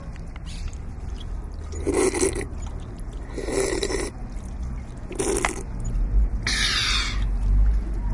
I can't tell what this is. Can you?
SonicSnap SASP JanAlba
Field recordings from Santa Anna school (Barcelona) and its surroundings, made by the students of 5th and 6th grade.